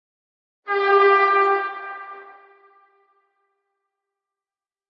bocina tren lejana / Distant train horn
Just a distant train horn with some natural reverb.
Bocina de tren en la lejanía, reverb natural.